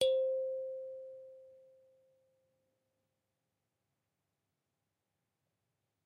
I sampled a Kalimba with two RHØDE NT5 into an EDIROL UA-25. Actually Stereo, because i couldn't decide wich Mic I should use...
african, c, kalimba, nature, note, pitch, short, sound, unprocessed